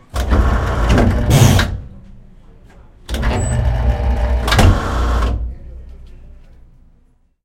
Paper Cutter 1
Recording of a "guillotine" style paper cutter.
paper-cutter, machine, industrial, field-recording